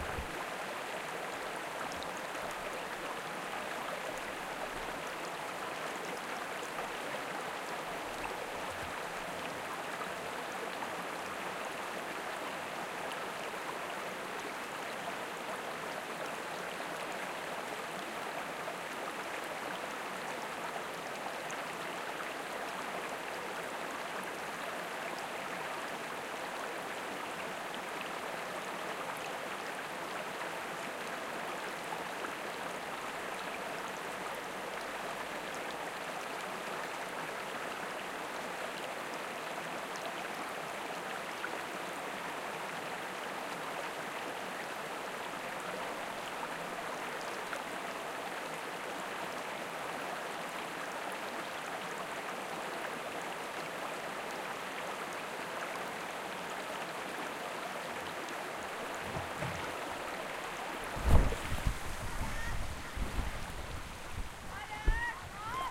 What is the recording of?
Stream Wales Kids Shouting
This is a recording of small cascade, above the waterfall in the other recording, in a stream near Rhos, Wales. We had had heavy rain overnight so it was in full flow. To me it also sounds like rain falling. Recorded on Zoom H4N Pro. There are some kids shouting in the distance towards the end of the track. There is some handling noise at the beginning and end.